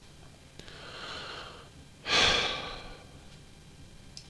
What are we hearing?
Sigh 1 Male
A young male sighing, possibly in frustration, exasperation, boredom, anger, etc.
breath, breathe, human, male, man, reaction, sigh, speech, vocal, voice